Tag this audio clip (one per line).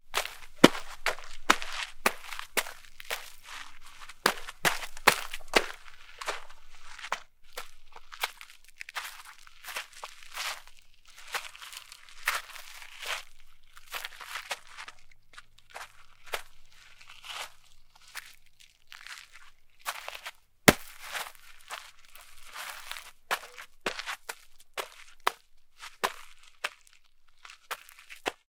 field-recording
jumping
lava
rubber-sole
volcanic